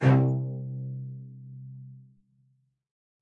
One-shot from Versilian Studios Chamber Orchestra 2: Community Edition sampling project.
Instrument family: Strings
Instrument: Cello Section
Articulation: spiccato
Note: C2
Midi note: 36
Midi velocity (center): 95
Microphone: 2x Rode NT1-A spaced pair, 1 Royer R-101.
Performer: Cristobal Cruz-Garcia, Addy Harris, Parker Ousley